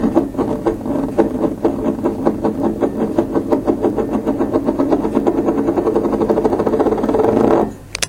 puodel letai 2
tea mug spinning
mug, spinning, tea